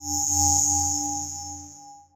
light-beam-1
Sounds used in the game "Unknown Invaders".
alien, space, ship